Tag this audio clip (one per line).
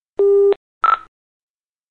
intercom
radio